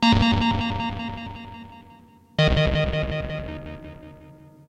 Roland SH-101 through Roland Spring Reverb